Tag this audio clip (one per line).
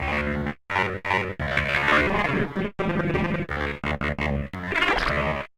electronic
glitch
loop
melody
robot